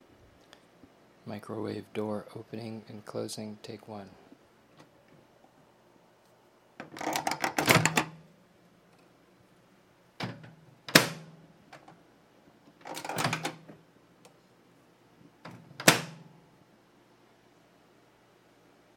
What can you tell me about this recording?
Microwave door opening y closing
200s model of cheap microwave with plastic door opening and closing, twice. Recorded with Rode NTG-2 & Tascam DR-60D